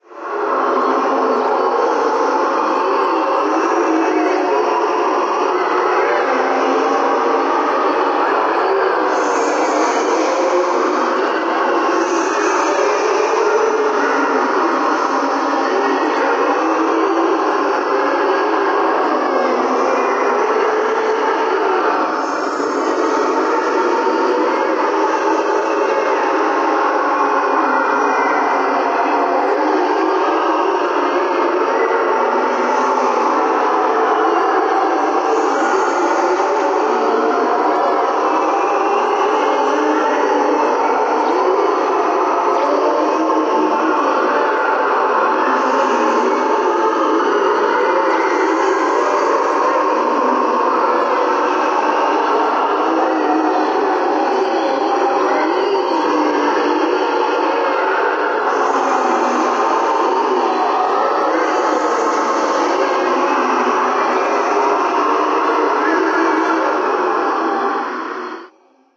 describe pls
Mix of about 4 separate runs of an Analog Box circuit designed to sound just human enough to be disturbing. The first version freaked out my dog. I can't stand to listen to it very much, so I may have missed some things. Oh well. You might use it as a special effect where someone dreams of hell, or something like that. I first put it into the musical pack because there is some creepy tonal singing in the background, but then I decided to move it to my backgrounds pack -- there is a somewhat "better" version there called UnrelentingAgony2. Warning: if you are a very sensitive person, you may want to avoid listening to this, and otherwise just keep reminding yourself that it was generated entirely by a virtual synthesizer circuit. No humans, monsters, demons, cats, or babies were harmed in producing this sound bite, despite what you may think you hear. It's lots of oscillators and filters, some delays, etc. That's all.